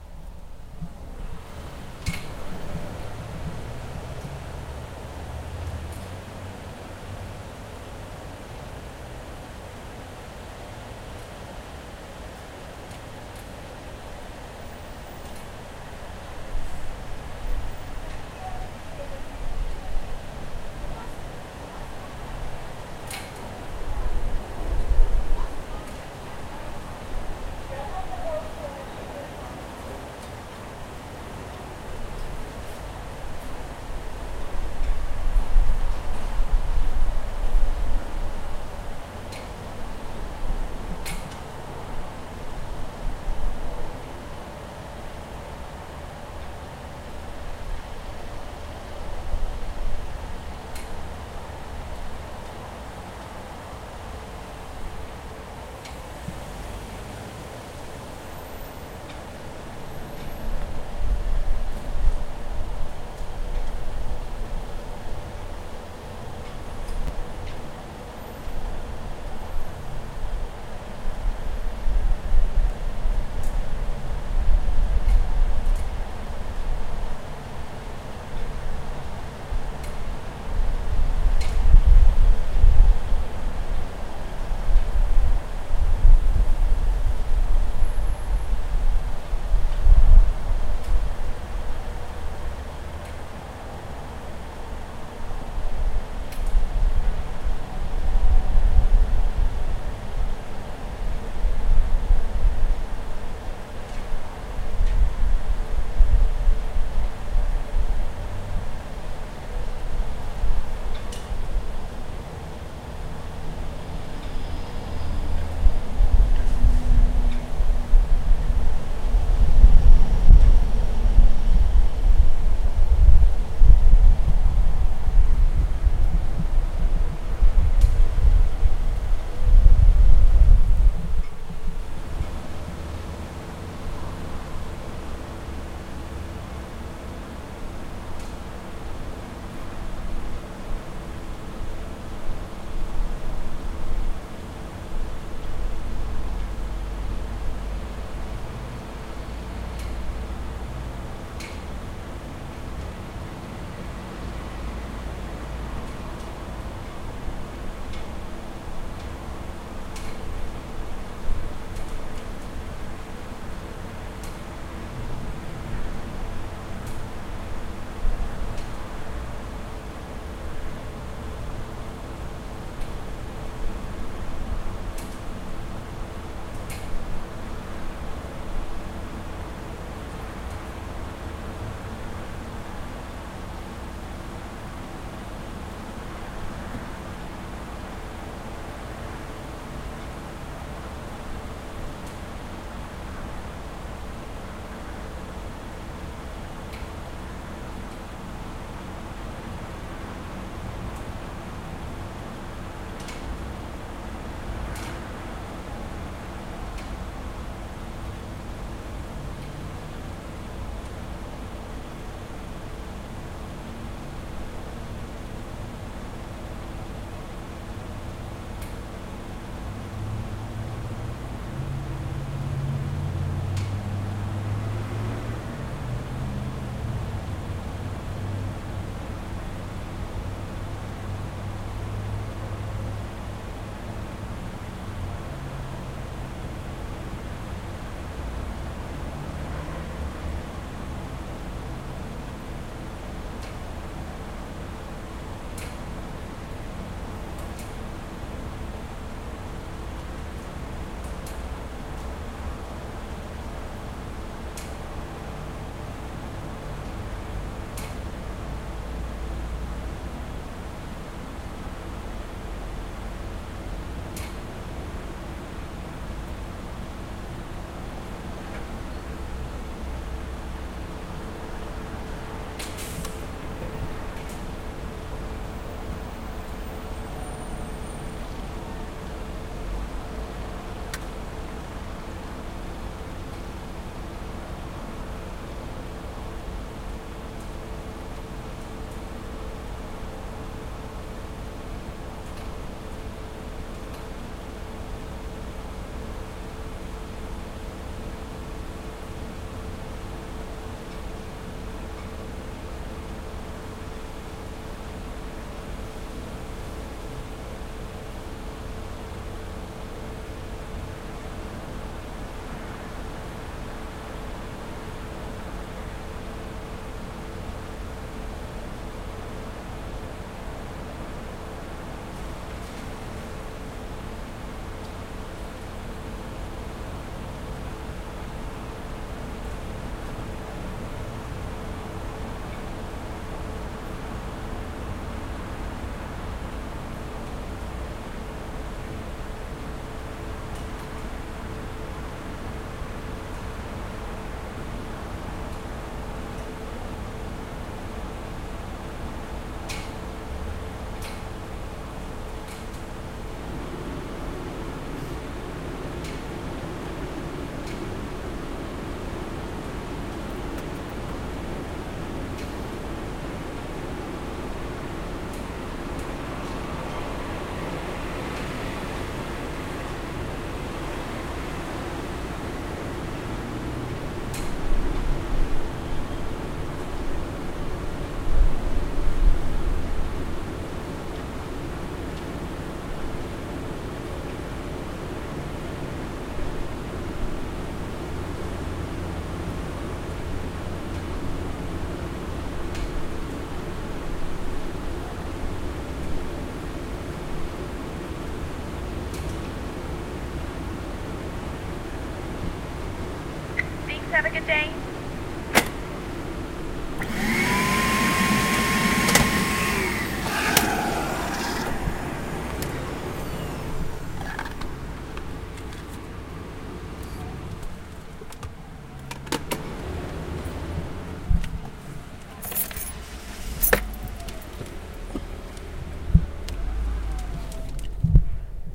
Excruciatingly long and unexpected wait at the drive thru anticipating the return of the capsule via pneumatics.
bank, drive-thru, idlng, tube, waiting